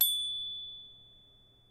snd fragment retrieve
A light glassy "ping", like a crystal object hitting the ground.
bell, chime, crystal, ding, glass, ping, shimmer, shine, ting